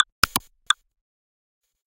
clean percussion rhythm modelled on the tick tock of a clock
tock, drum, clean, clock, percussion, tick